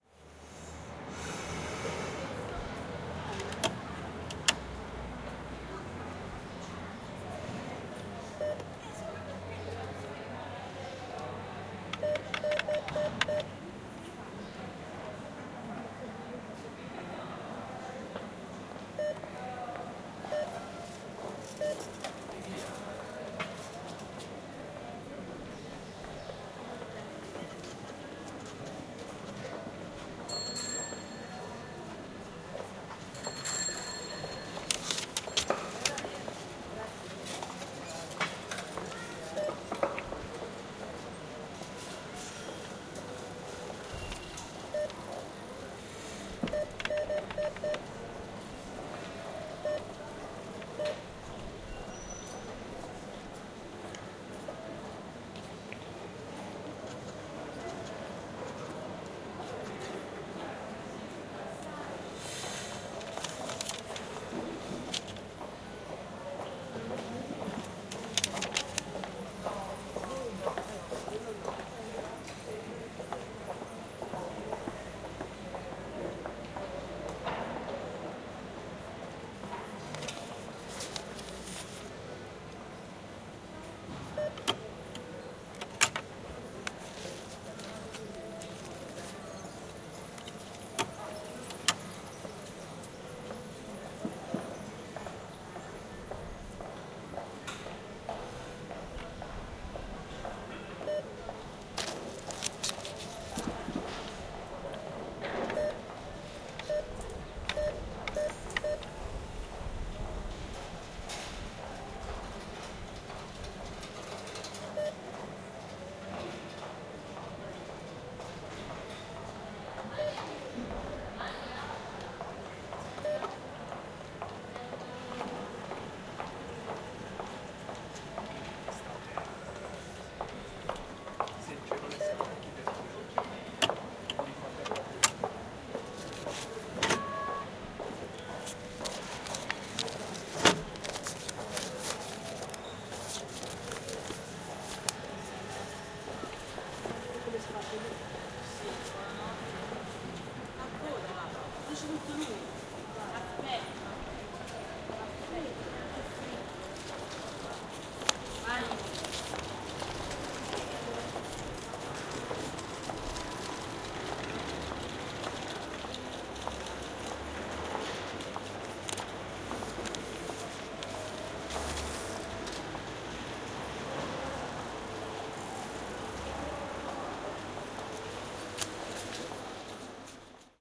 0403181103 bancomat in via del corso
18 mar 2004 11:03 - Street (Via del Corso - small pedestrian street in the centre of Florence, Italy)
cash dispenser sound, birds?, bike bell, heels, footsteps, female voices, a far clarinet sound.
bell, bike, cashpoint, clarinet, female, field-recording, firenze, florence, footsteps, heels, voice